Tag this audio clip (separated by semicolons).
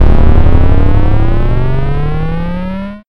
Motorbike; Fast; Hurry; Speed